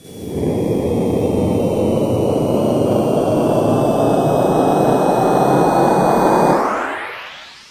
progressive psytrance goa psytrance
goa, progressive, psytrance